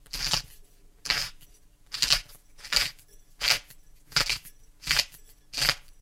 Pepper mill grinds pepper.
breakfast, cook, cooking, food, grinding, kitchen, mill, pepper, restaurant